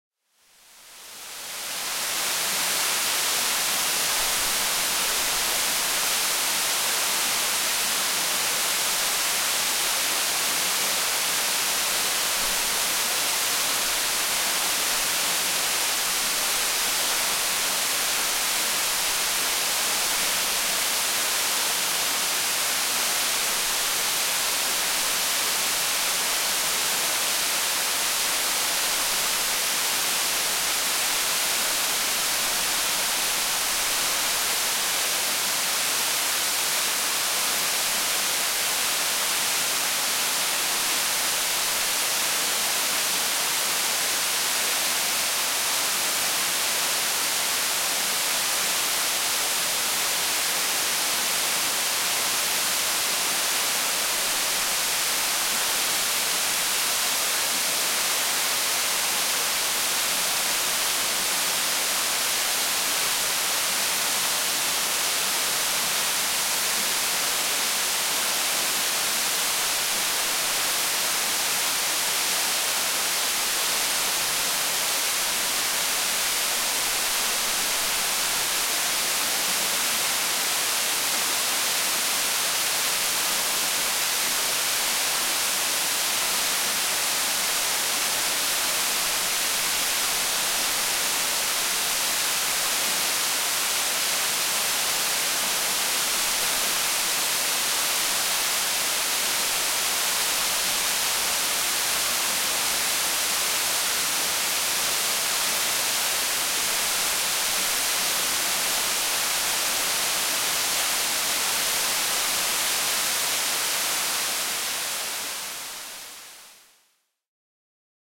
Waterfall in La Palma island (Bosque de Los Tilos)
This is one of the very few Canarian permanent waterfalls. It is located in Los Tilos Forest, a Biosphere Reserve within Las Nieves Natural Park in La Palma (Canary Islands, Spain). It's about 25 meters high and flows into a gorge covered by a thick laurel forest (laurisilva), a tropical ecosystem full of trees and ferns resembling Jurassic Park.
Recorded with Sony PCM-D50's stereo mic.